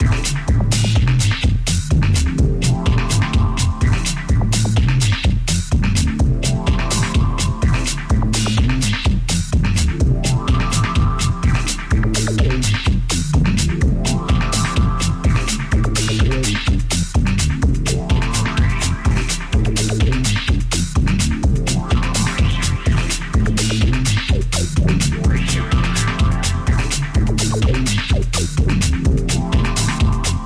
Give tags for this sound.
deep house lo-fi loop raw

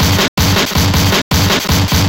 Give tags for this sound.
loop drumbreak hardcore breakbeat drum

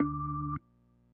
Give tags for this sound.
d4 note organ

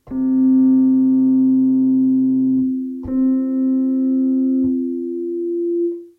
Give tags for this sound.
keyboard underwater feedback water

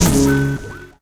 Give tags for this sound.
electronic percussion

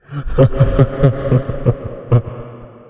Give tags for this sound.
ambiance creepy drama evil fear fearful haunted horror laugh phantom scary sinister spooky suspense terror

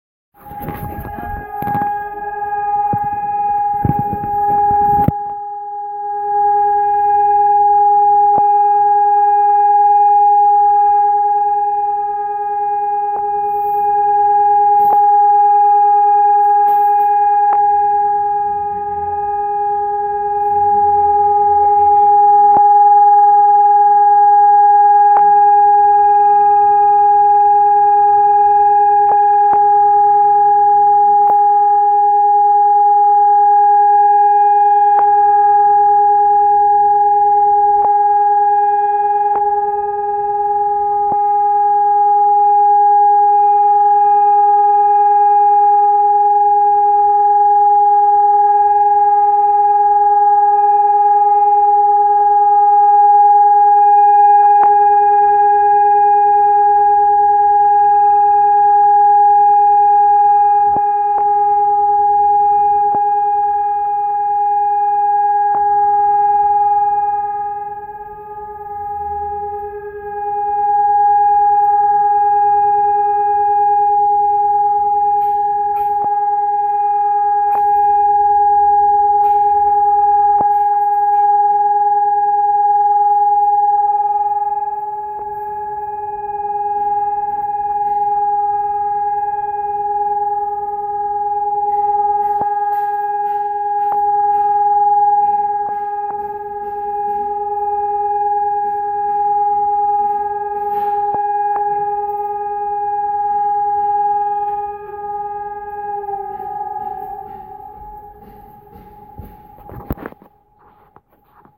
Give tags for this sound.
air-raid warning testing alarm siren

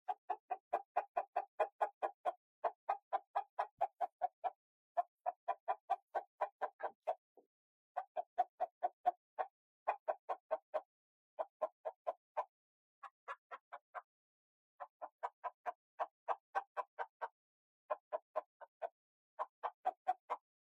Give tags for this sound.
cock rooster crowing

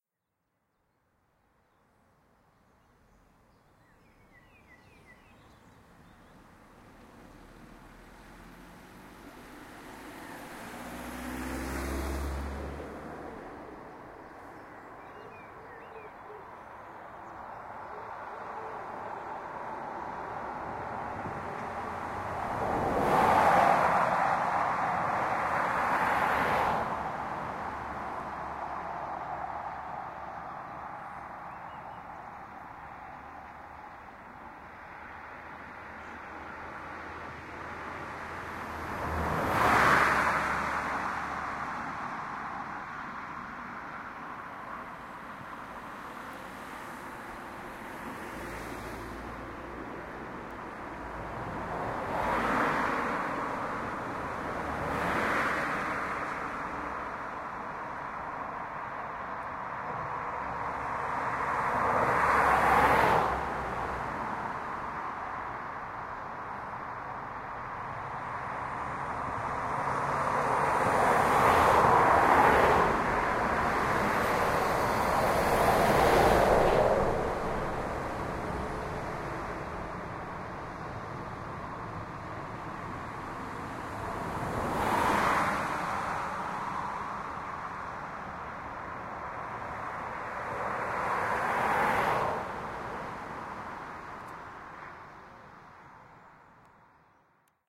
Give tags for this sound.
Bridge Cars Crowds Drive Engine Field-recording Highway Motorway Passing Road Transport Transportation Travel

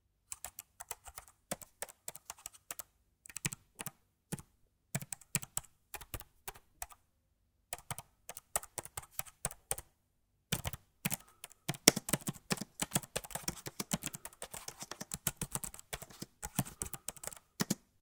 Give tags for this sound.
apple buttons click close computer desktop effect fast fingers keyboard mac machine macintosh modern new sfx sound space technology text type typing up wireless writing

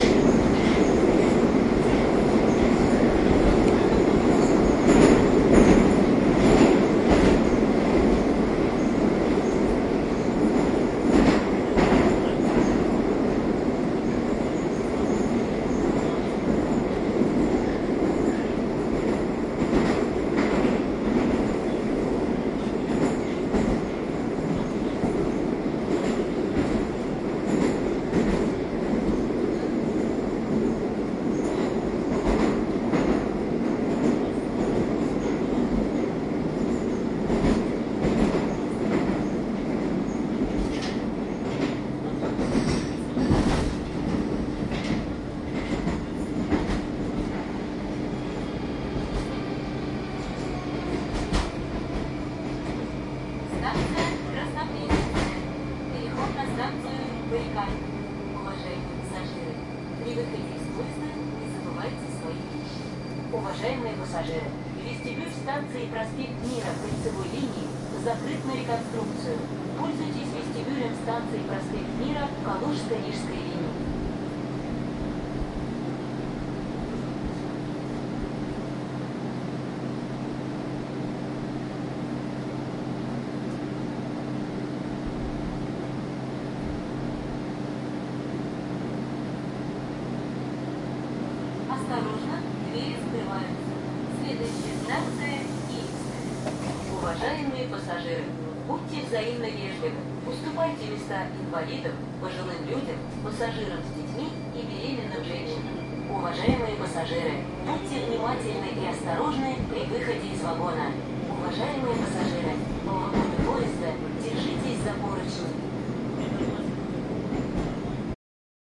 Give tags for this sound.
Moscow rail train

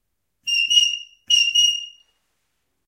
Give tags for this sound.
command dog sheep sheepdog stereo whistling

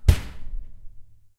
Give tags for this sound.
hit home door

